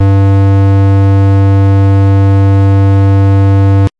LR35902 Square G3
A sound which reminded me a lot of the GameBoy. I've named it after the GB's CPU - the Sharp LR35902 - which also handled the GB's audio. This is the note G of octave 3. (Created with AudioSauna.)